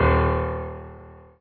Piano ff 007